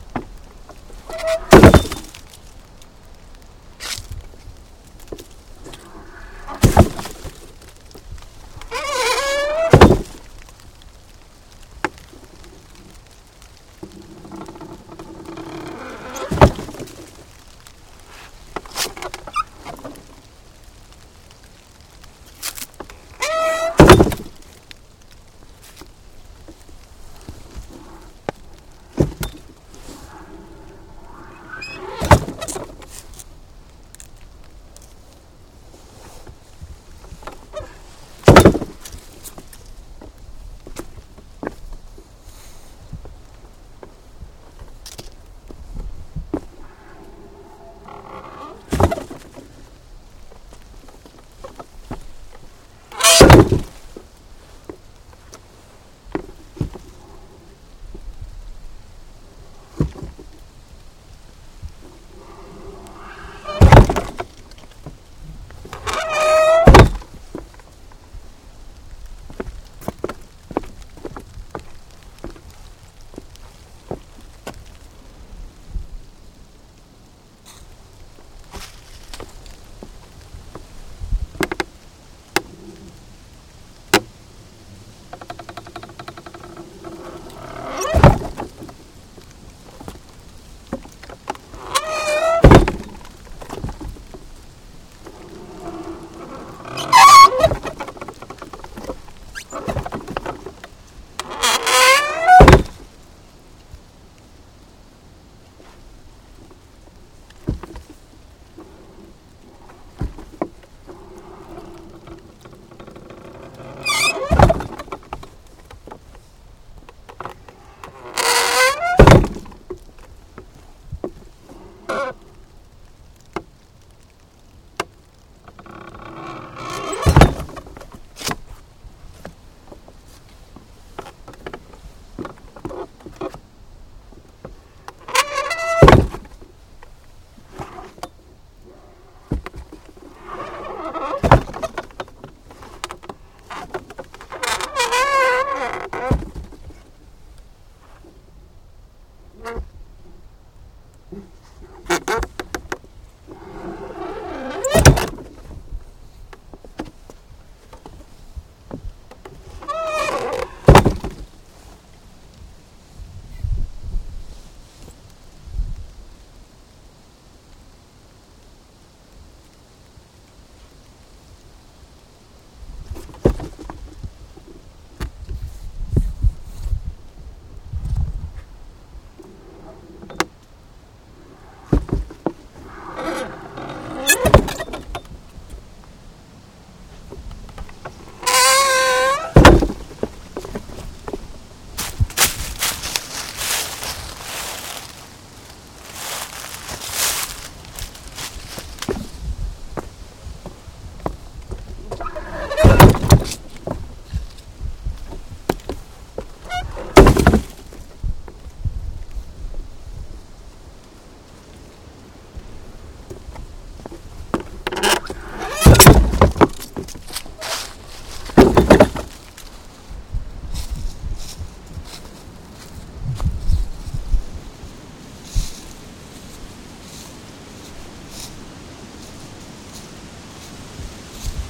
I was recording wind ambience as Hurricane Sandy was currently swirling around. Along this bike trail I found this wooden ramp. Upon standing on it I realized it moved! And better yet it made some pretty cool creaking sounds!